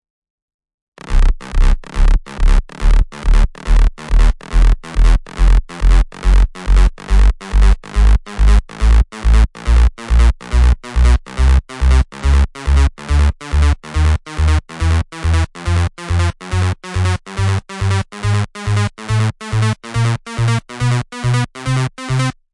3xOsc Bass 2 Octaves
Bass made with 3xOsc (140BPM)